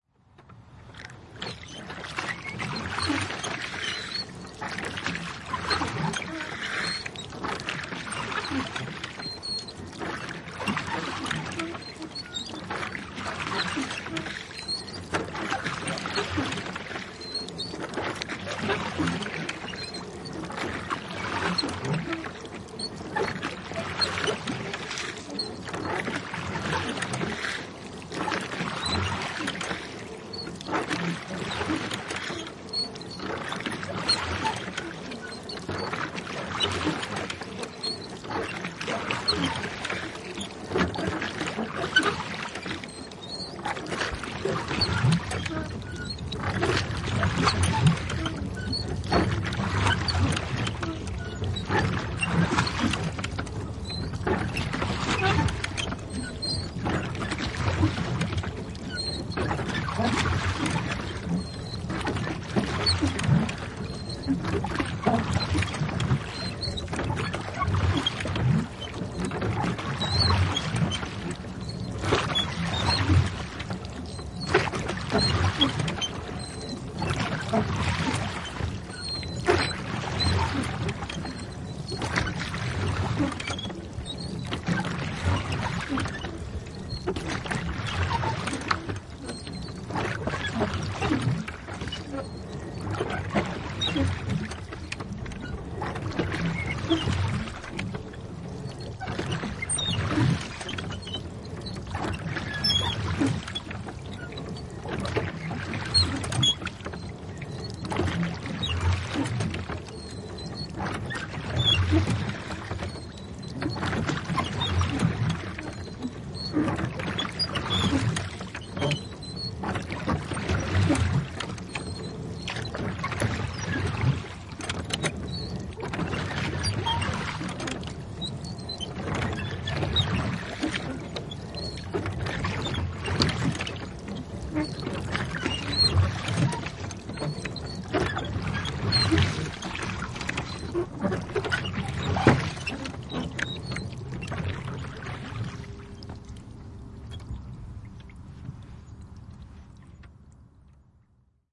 Kirkkovene, soutu / Rowing boat, several, eight pairs of oars, so called churchboat, rowing
Kahdeksan airoparin kirkkovene, soutuvene. Soutua mukana.
Paikka/Place: Suomi / Finland / Vihti
Aika/Date: 03.09.1989
Boating, Field-Recording, Finland, Finnish-Broadcasting-Company, Soundfx, Soutuveneet, Suomi, Tehosteet, Veneily, Vesiliikenne, Yle, Yleisradio